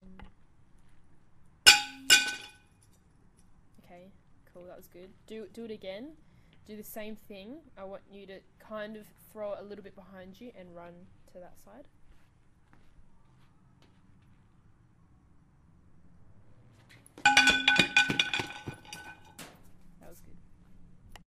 Baseball bat dropping on cement then running
baseball, fall, cement, bat, pole